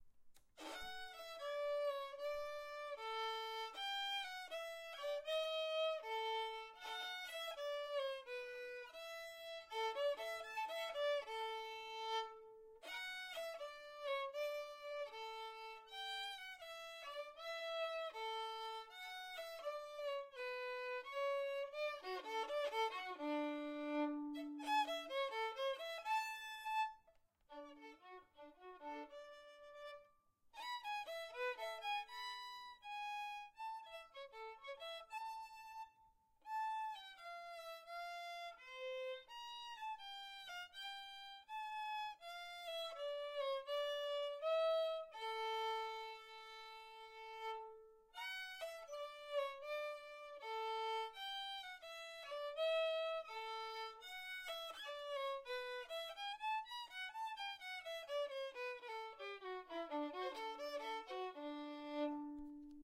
A squeaky violin